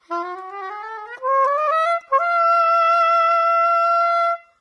Non-sense sax played like a toy. Recorded mono with dynamic mic over the right hand.
loop, melody, sax, saxophone, soprano, soprano-sax, soprano-saxophone